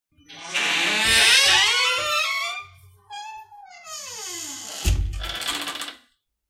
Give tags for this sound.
creak; door; open; squeak; wooden

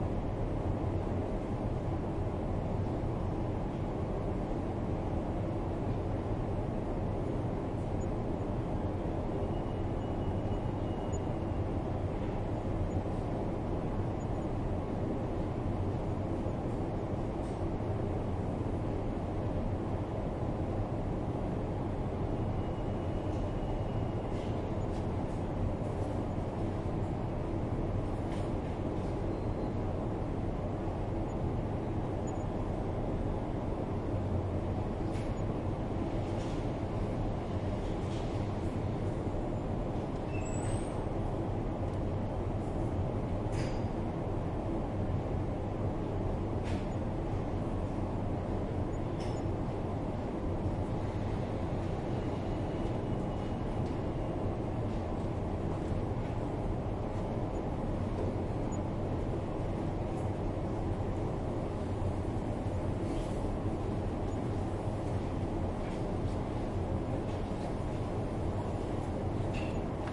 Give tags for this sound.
Baltic; drone; interior; ocean; surround

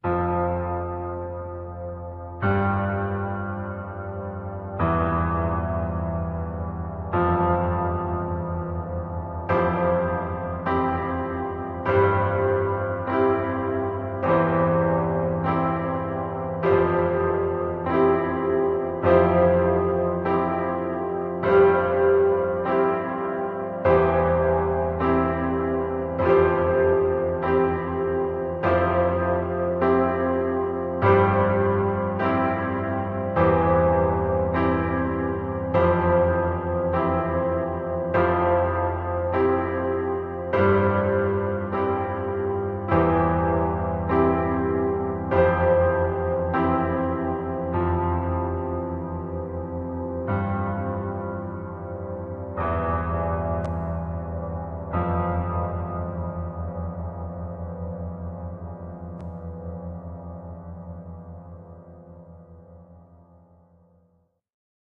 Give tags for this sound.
tape; piano; chords; reverb

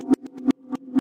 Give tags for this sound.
percussion; bongo; drum

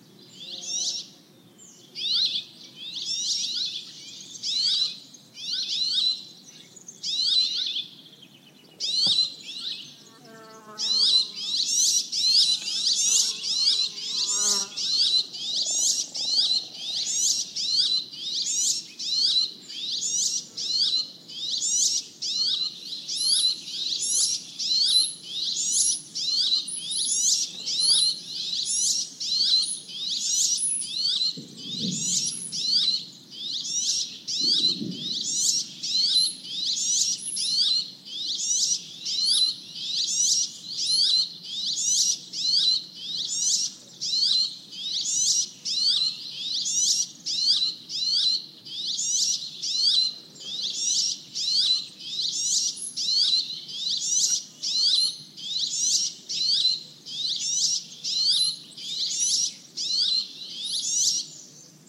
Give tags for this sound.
nature; spring; birds; forest; field-recording

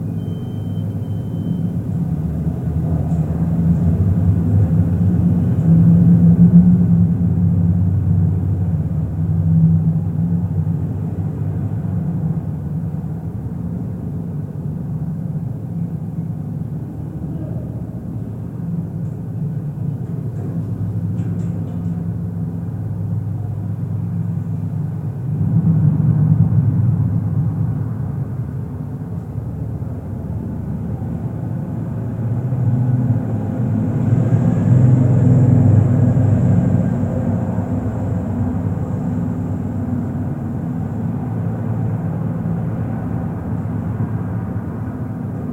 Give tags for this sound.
normalized; wikiGong

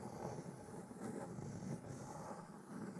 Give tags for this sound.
circle; paper; pencil; loop; continue

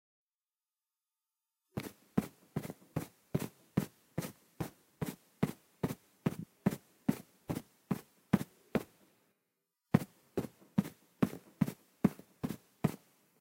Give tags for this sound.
tramp; walk; march; agaxly

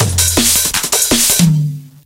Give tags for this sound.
162bpm; DnB; Drum-and-Bass; loop; loops

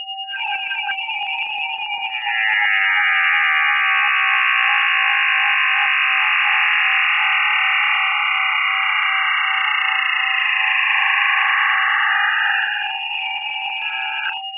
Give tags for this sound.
brain; circle; image; ring; scan; sound; weird

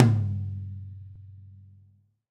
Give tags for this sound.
acoustic; drums; stereo

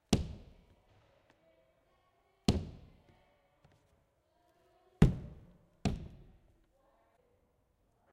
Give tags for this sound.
recording; Elementary; Commodore

